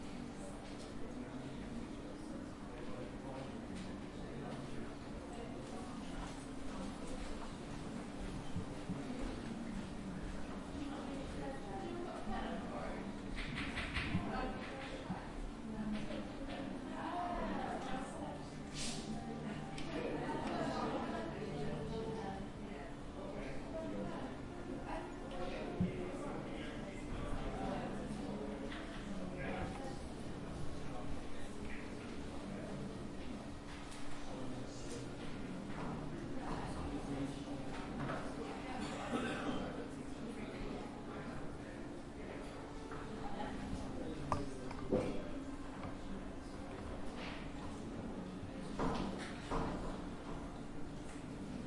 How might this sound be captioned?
Bexhill College Hallway Loop (Edited Loop)
I reduced the distinctness of some foreground talking and some equipment noise, and used a basic split + crossfade to make it loopable.
teacher, class, ambient, students, lecture, loop, recess, college, people, school, classroom, field-recording, hallway